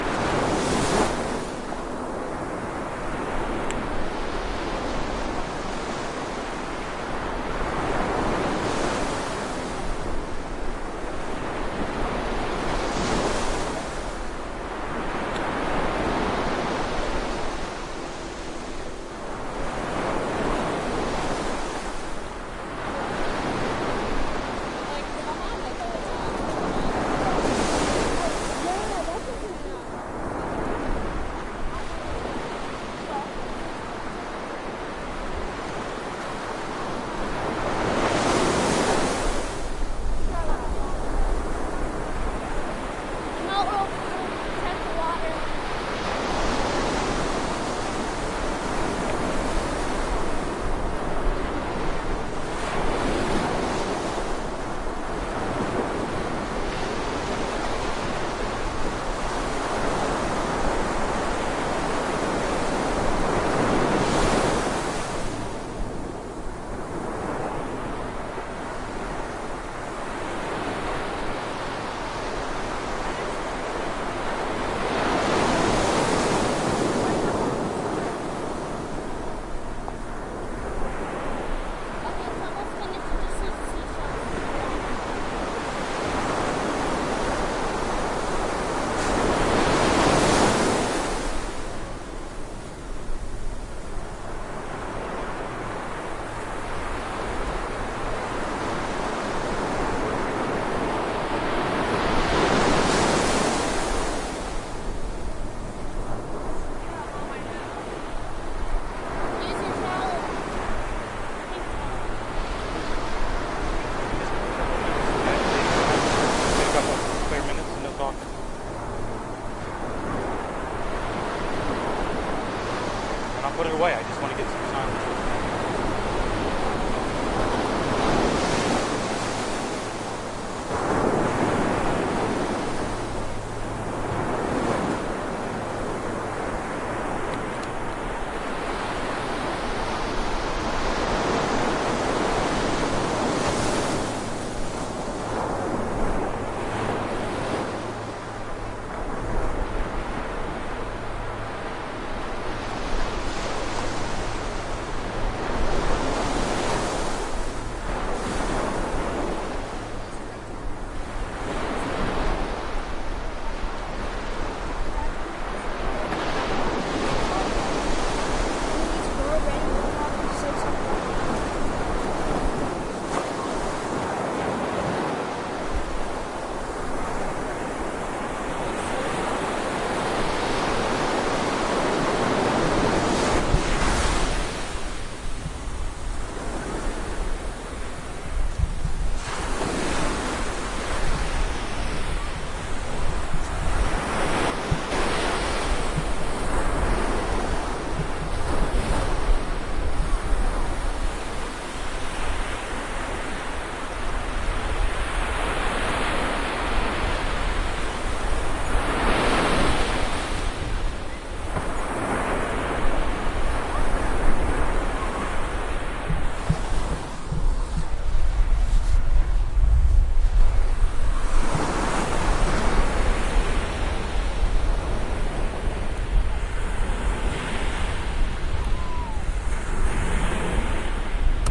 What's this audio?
I made a few attempts to simulate stereo because I cannot afford to buy a stereo USB microphone, I didn't even pay for this mono one. I started with EQ and dedicating different bands to each channel.
summer, waves, surf, beach, ocean, ambient